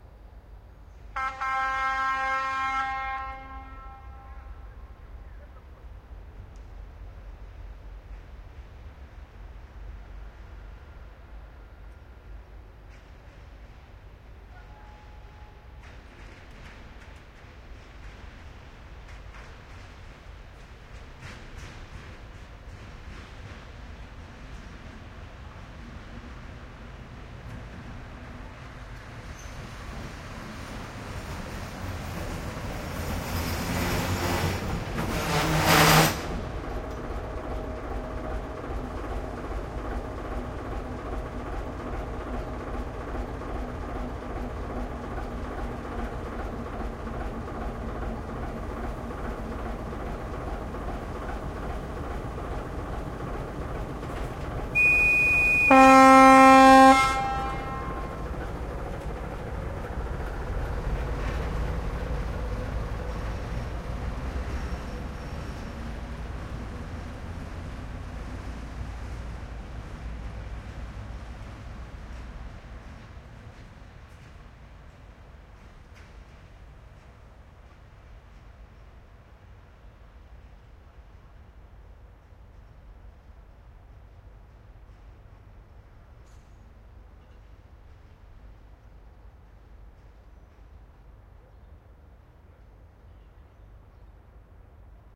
locomotive w whistle
The locomotive whistle and slowly makes passes